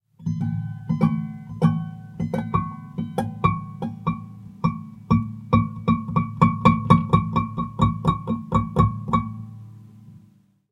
Wind chimes
guitare, horror, strings, wind